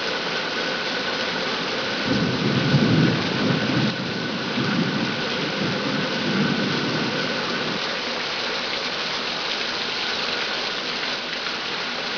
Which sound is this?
FL rainstorm undermetalporchroof
Afternoon summer thunderstorm in FL. Recorded from under my metal porch roof with an iPhone 4S internal mic.
FL, metal-porch-roof, nature, nature-sounds, rain, rain-storm, thunder